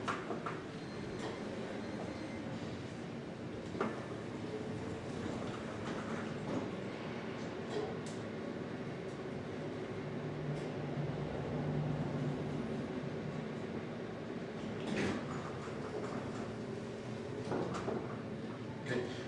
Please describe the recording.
Elevador moving Roomtone
room-tone, elevator, hall